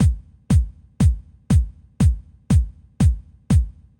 Kick house loop 120bpm-01
kick, 120bpm, loop